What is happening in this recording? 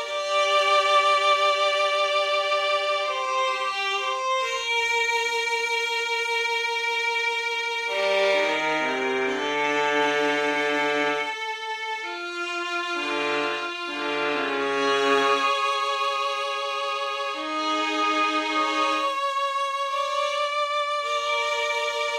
Sad Loop #3
A Sad loop made in FL Studio.
2023.